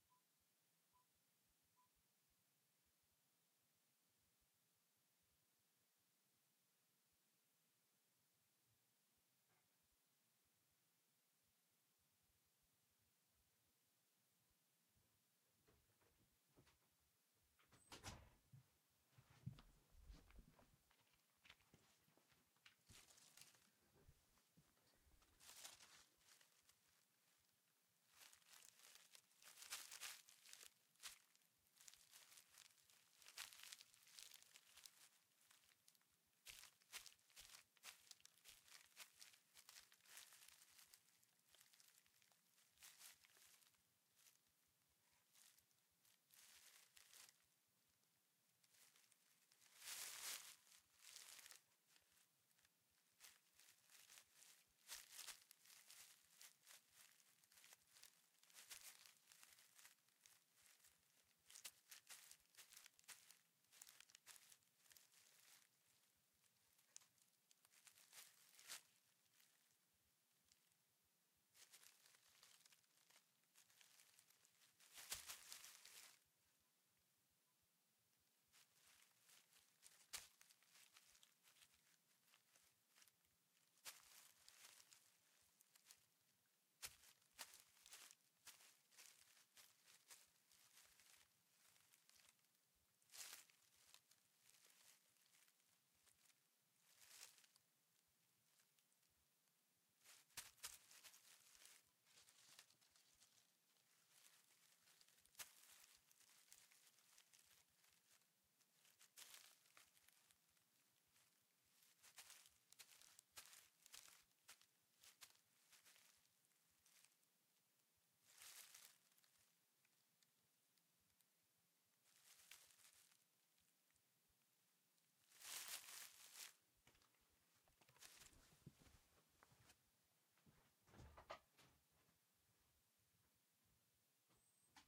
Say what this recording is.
Feet in leafs
Feet, Foley, Leafs